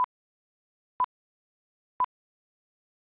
1k bleeps -20dBFS 3 Seconds
BLEEPS, LINE, UP